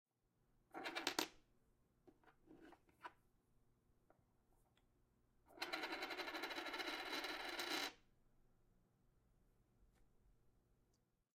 fliping coin on wood table
2 sounds of a coin over a wood table
flipping, coin, wood